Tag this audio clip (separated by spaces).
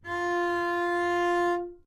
double-bass F4 good-sounds multisample neumann-U87 single-note